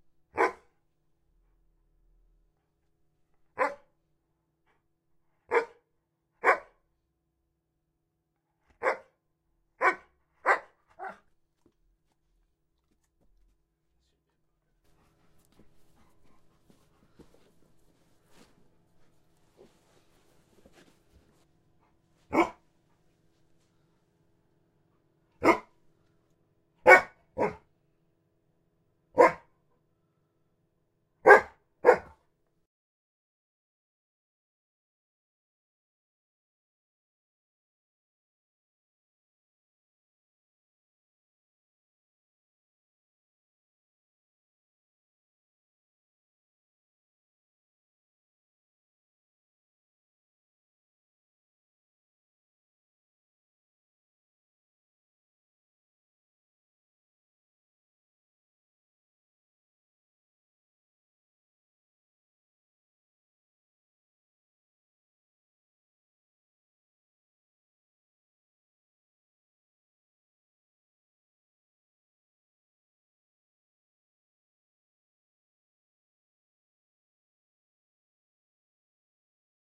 Mr Dog 01
Recording of my alaskan malamute barking.
Creature, growling, wolf